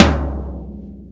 maypex-TOM floor
AKG mic into M-Audio external sound card and USB into laptop.
Maypex drum kit, a floor tom hit with unfortunate weak bass output.
drums, maypex, hits, tom, kit, thud, floor-tom, field-recording